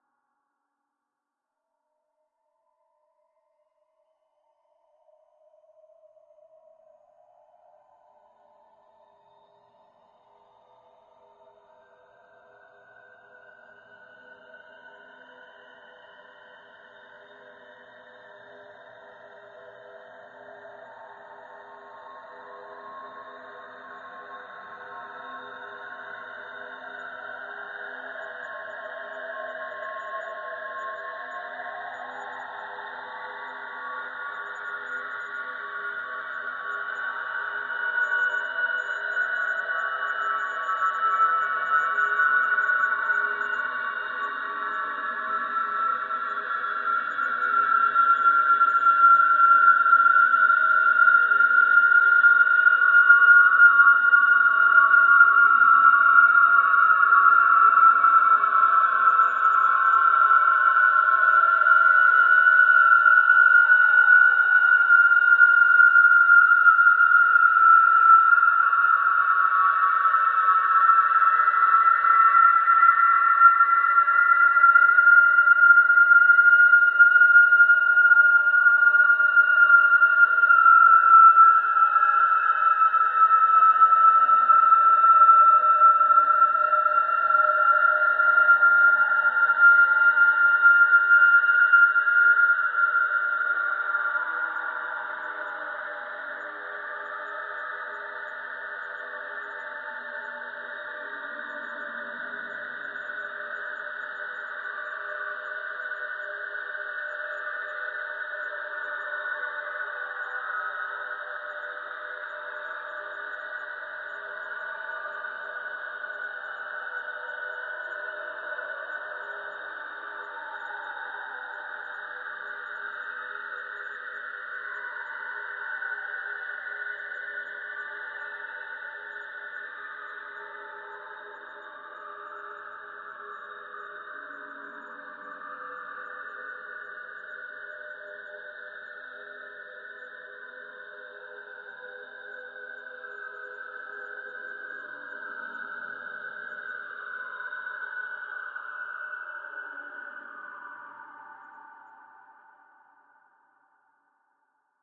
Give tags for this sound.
evolving; freaky; artificial

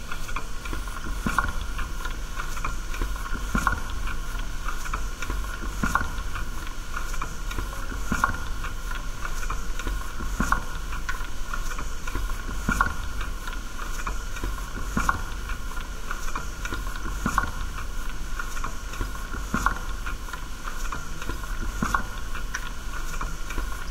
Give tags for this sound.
machine; periodical; mechanical; noise; boiler-room; unprocessed; heating

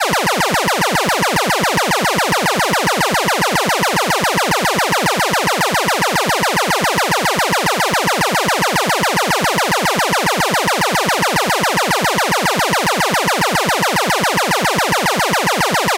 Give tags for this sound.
siren; synthetic; cartoon

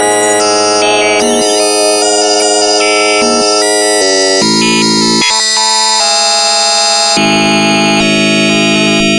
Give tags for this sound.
circuit; bent; psr-12

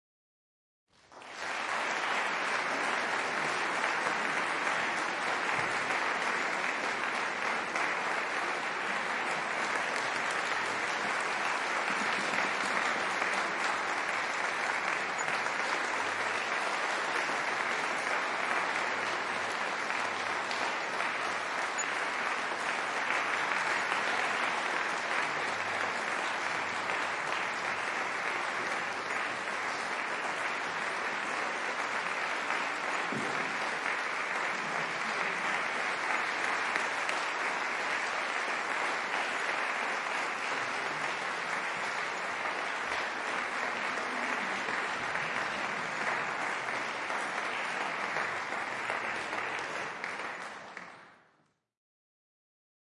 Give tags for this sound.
applauding; clapping; cheering

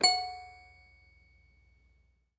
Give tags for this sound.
Toy; Circus; Carnival; toy-piano; sounds; Piano

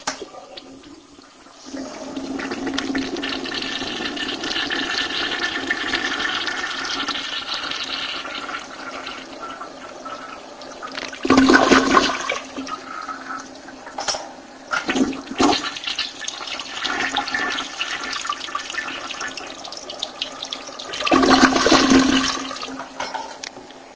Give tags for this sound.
toilet; flush

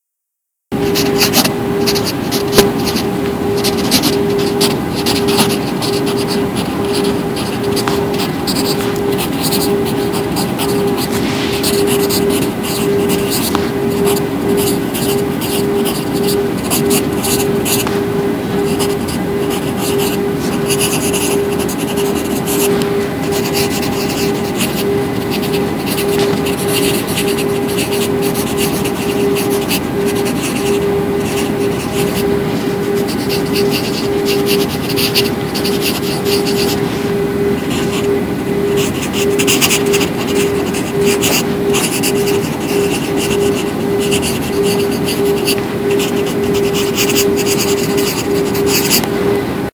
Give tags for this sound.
write; pencil; writing; scratching; scratch

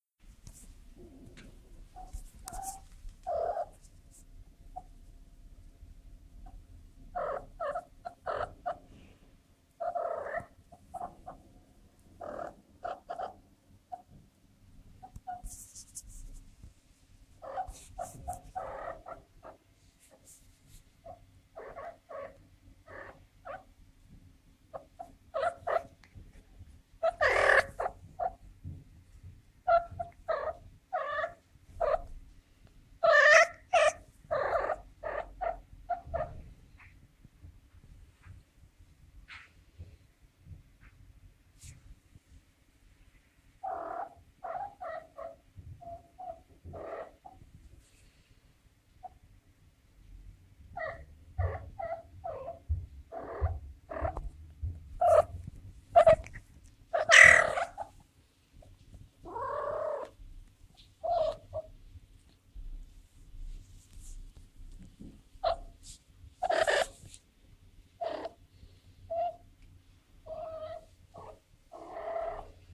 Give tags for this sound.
kitty; rut; tweet

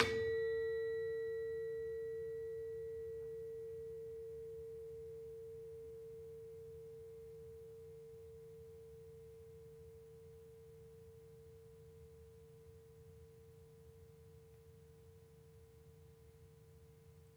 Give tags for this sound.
Casa-da-m; Digit; digitopia; Gamel; Gamelan; Java; o; pia; porto; sica

